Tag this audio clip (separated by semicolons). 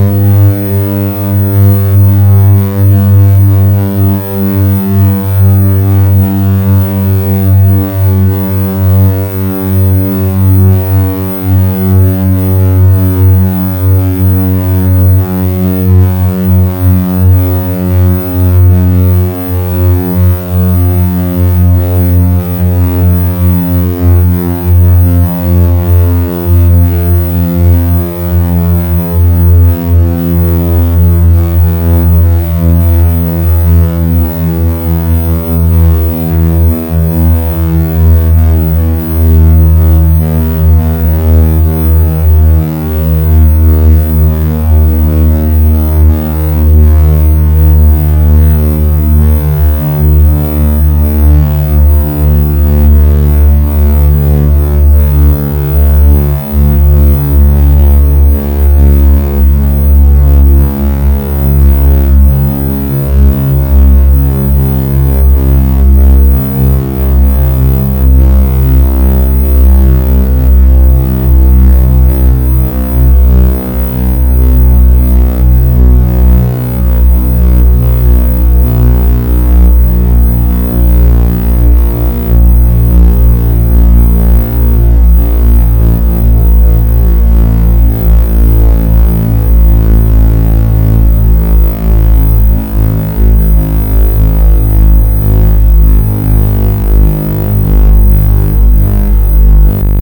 32030Hz; sawtooth; sweep; 32020Hz